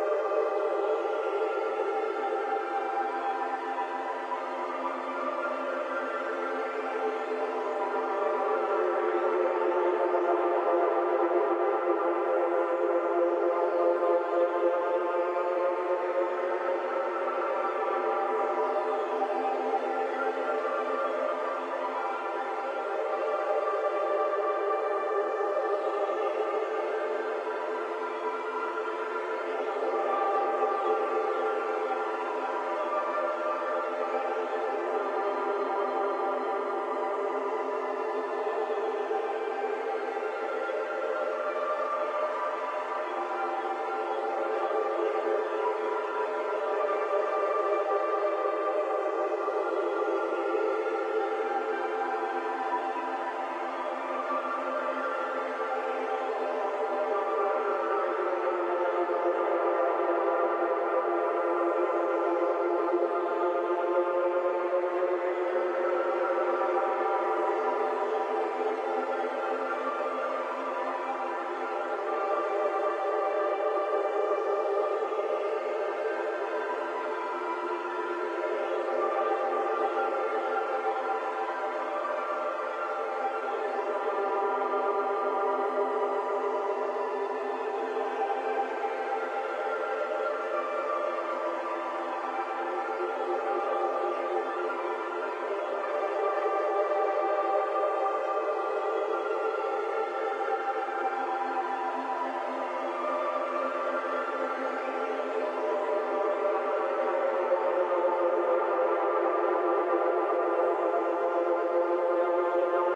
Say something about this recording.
Pad, created for my album "Life in the Troposphere".